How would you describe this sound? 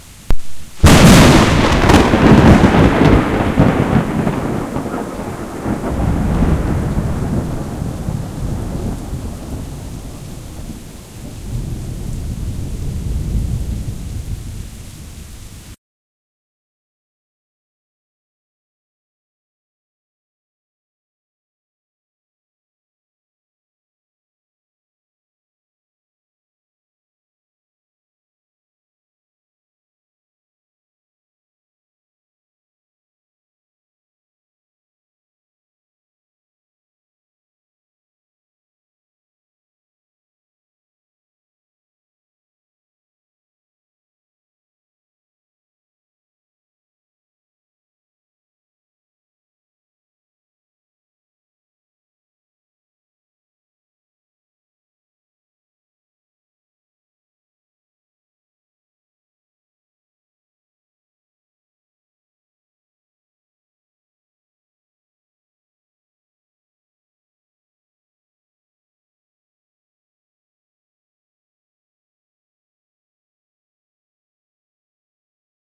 a short cut made from big lightning with dc spike reverse by Ionizing